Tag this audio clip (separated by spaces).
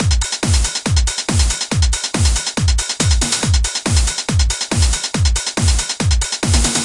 140 beat bmp techno trance